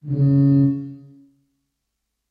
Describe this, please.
tuba note-11
ambience, terrifying